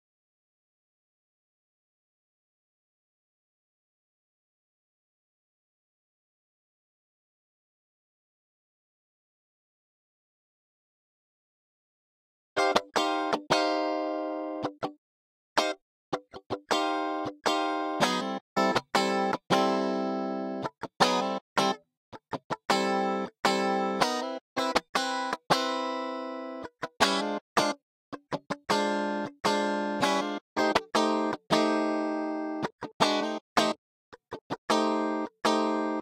Song1 GUITAR Do 4:4 80bpms
loop, blues, Chord, HearHear, rythm, bpm, 80, beat, Do, Guitar